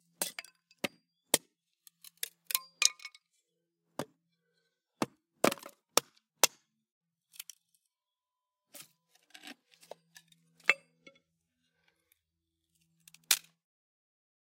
SFX, wood, stone floor, axe handling, chop, ching